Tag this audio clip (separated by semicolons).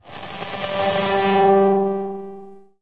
dark; distorted; distortion; drone; experimental; noise; perc; sfx